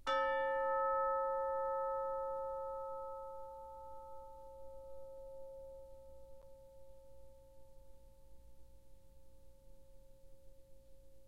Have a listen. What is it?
Instrument: Orchestral Chimes/Tubular Bells, Chromatic- C3-F4
Note: A, Octave 1
Volume: Piano (p)
RR Var: 1
Mic Setup: 6 SM-57's: 4 in Decca Tree (side-stereo pair-side), 2 close